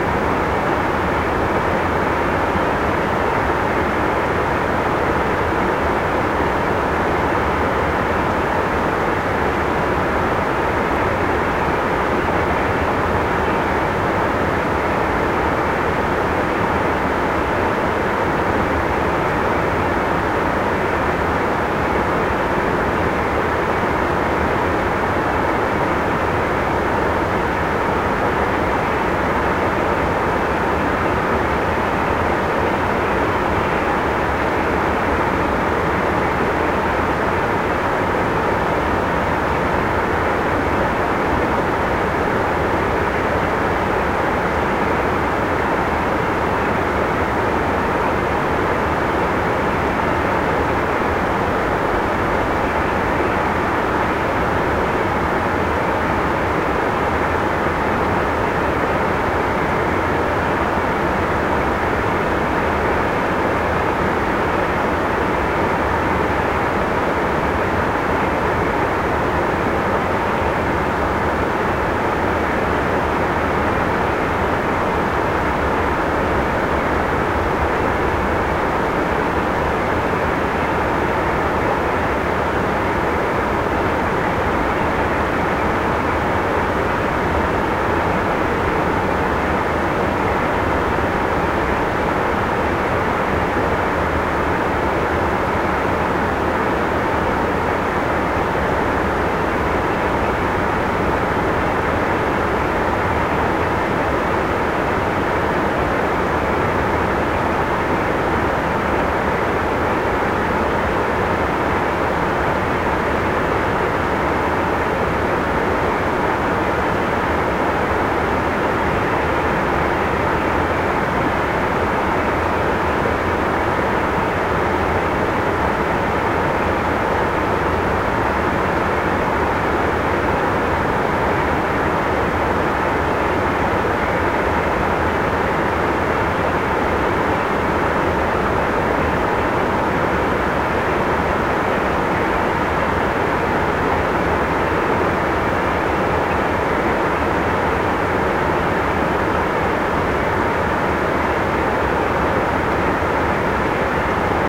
A stereo field-recording of meltwater at a series of small waterfalls in a small river. Rode NT4>Fel battery preamp>Zoom H2 line in